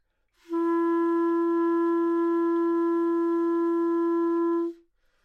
Part of the Good-sounds dataset of monophonic instrumental sounds.
instrument::clarinet
note::E
octave::4
midi note::52
good-sounds-id::2299